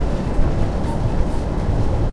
Field recording from Whirlpool factory in Wroclaw Poland. Big machines and soundscapes